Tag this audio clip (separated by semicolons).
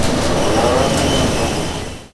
factory; field-recording; machines